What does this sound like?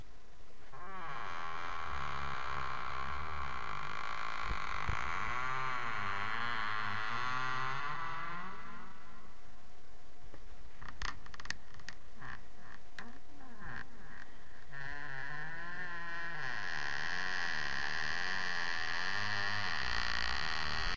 Creeking door with echo, acutally my bedroom door that needs oiling.